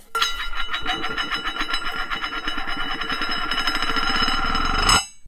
Rotate metal lid 3
Recorded with H4n - Dropped a biscuit tin lid and recorded as it rotated to a stop.